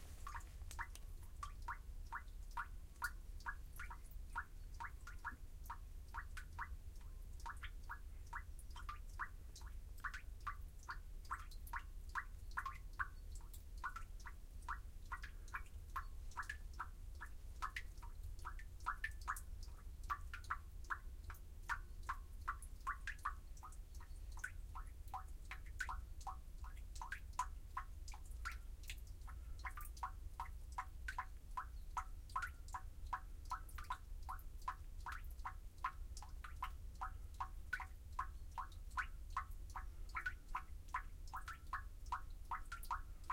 drippin drain
field recording of a drain dripping with natural reverb